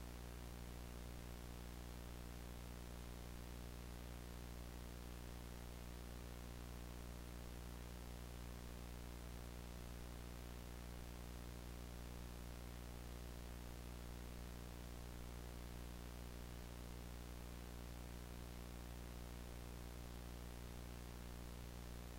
VHS VCR hum A
VHS Hum made in audacity when playing around with frequencies
80s, tape, vcr, vhs